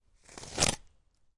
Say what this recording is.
Scratching a piece of wood.